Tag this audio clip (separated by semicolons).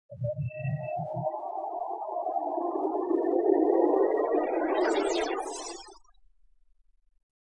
bubbling; sci-fi